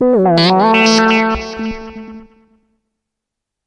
Notification sound made on VA ARP 2600
synthesizer, arp, analog, synth, notification